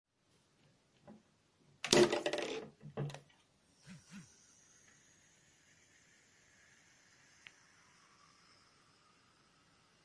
Opening a ramune bottle
Cute, Bootle, Drink, Opening, Japanese, Sample, Kawaii, Ramune